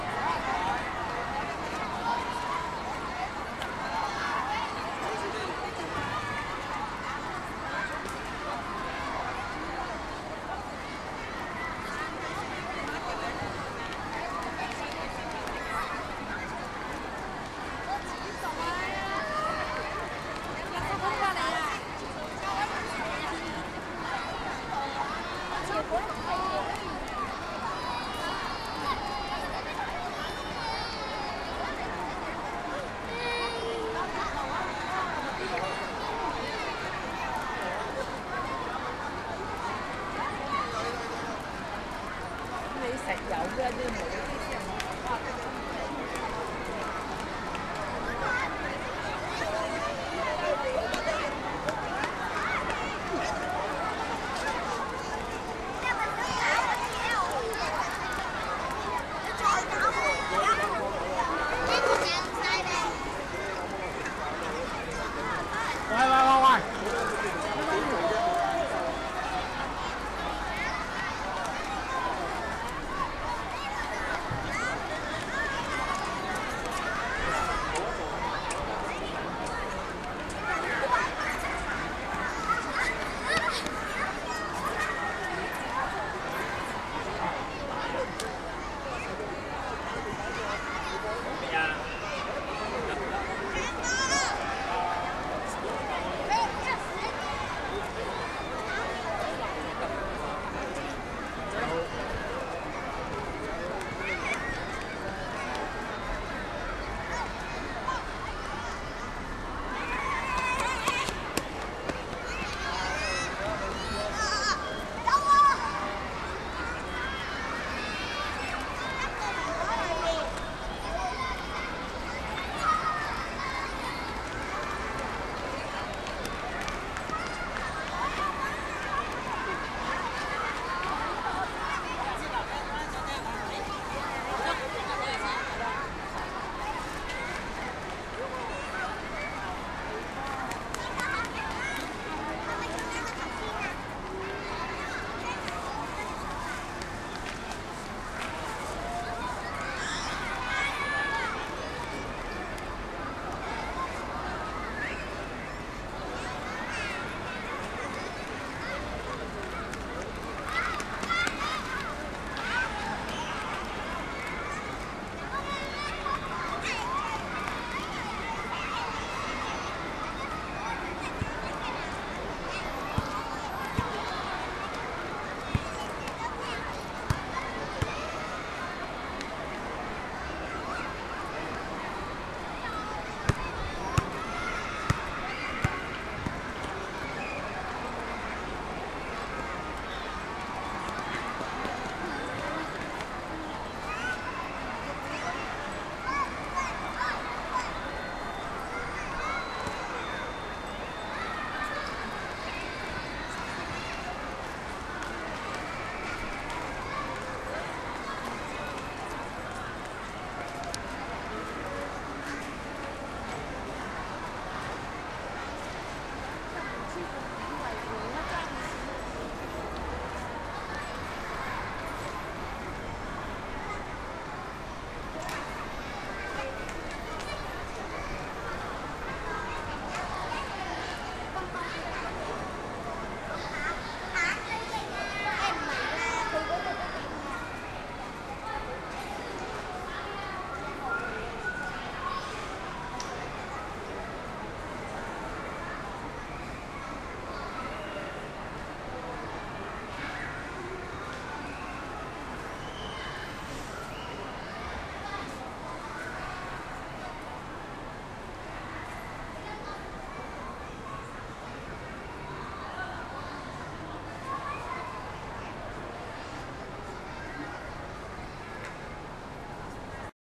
mid autumn2
Chinese traditional Festival. Ambience recorded in a public area with children laughing, running, people chatting etc using, using iPod touch with iProRecorder Application